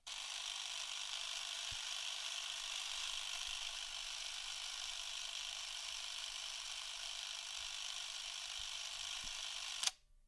Super 8 Camera Roll (GAF ST-802)
The motor running on a GAF ST-802 super 8 camera.
motor, super8